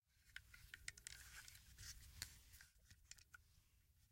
A gun being moved around
foley, gun, revolver